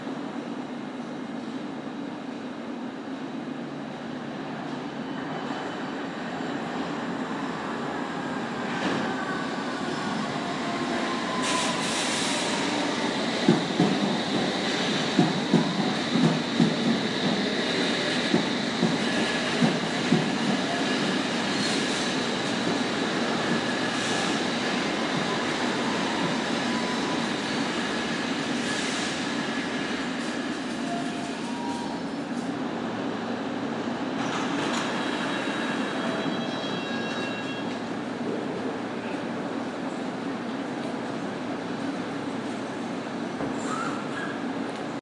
Tube 2 Bank Station 140918
Trains leaving and entering Bank Tube station, London. recorded on iPhone 5
london, underground, trains, tube